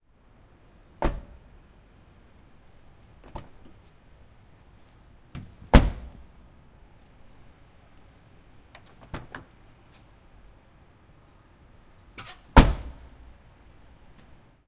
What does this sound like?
Exterior Prius door open close parking lot verby
Toyota Prius drivers side door opens and closes shot in an exterior covered parking lot.
door-close, door-open, Prius, Toyota